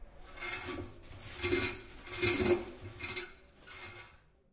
Perception of the sound: The sound of taking a piece of toilet paper (rolling) in a public toilet.
How the sound was recorded: Using a portable recorder (Zoom h2- stereo), with one hand rolling the paper, and with the other one carrying the recorder.
where it was recorded? UPF Communication Campus taller's male bathroom, Barcelona, Spain.
campus-upf, UPF-CS13, paper, roll, clean, toilet, poo